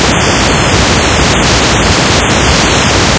Encrypted sound created with coagula using original bitmap images. Spectral view reveals secret message...
ambient, encrypted, hidden, message, space, synth